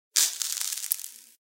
Use this sound if you need an environment, where you need some kind of noise like, for example in a cave, or old ceiling, etc.